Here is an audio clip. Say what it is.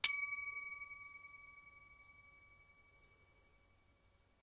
Small metal object resonating
resonate, tinkle